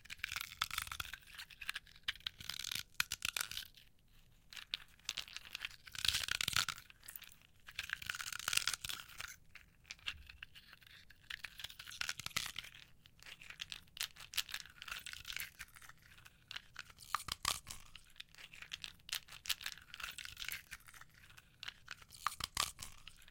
Stereo Recording of crushing ice